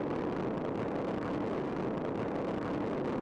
Rocket Flight Loop
Rocket missile flight loop noise.
flight, launch, looping, missile, rocket, seamless